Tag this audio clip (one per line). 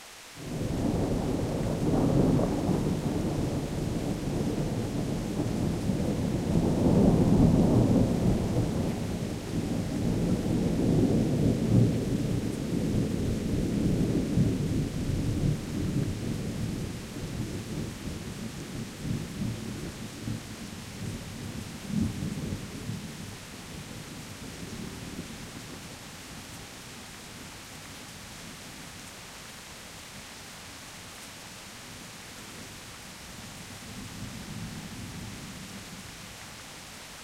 thunderclap
thunderstorm
thunder
field-recording